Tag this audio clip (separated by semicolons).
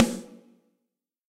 space; stereo; room; close; drum; live; real